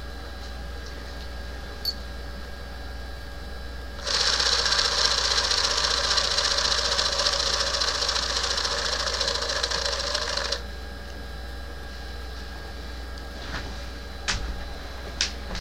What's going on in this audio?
The sound of a Keystone K-45 8mm film movie camera as is it is allowed to wind down. It is primely the rapid clicking of the shutter. The camera's frame rate is set at 16 FPS for this recording.
Recorded directly into an AC'97 sound chip with a generic microphone.
camera, clicks, motion-picture, wound, soundeffect, shutter, sound-effect, film, unprocessed, 8mm, spring, noise